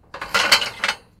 Metal handling bars in container 2
Metal handling bars in container
bars, container, handling, Metal